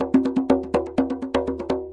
tambour djembe in french, recording for training rhythmic sample base music.